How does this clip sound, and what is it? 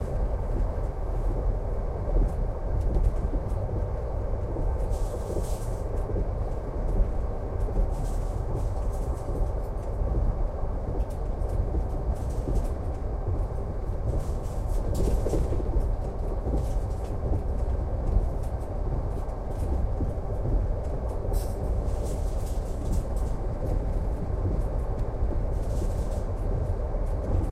night passenger wagon 3
Ride in the passenger wagon at night. Conductor clank dishes.
Recorded 30-03-2013.
XY-stereo.
Tascam DR-40, deadcat
conductor, passenger-wagon, wagon, railway, clank, travel, noise, passenger, rumble, trip, dishes, night, train